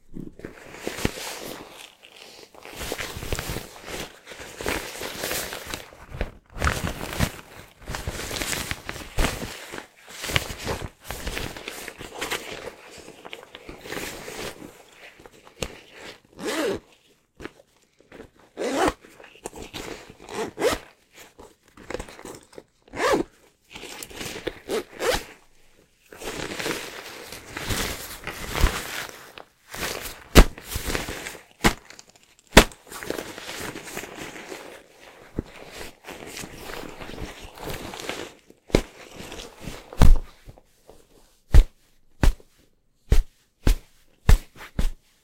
me playing with and unzipping a woven nylon bag(at least i think its nylon). i unzip and zip the bag a few times in this sound. this was recorded at my desk.